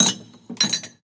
22b. rattling cups
rattling coffee cups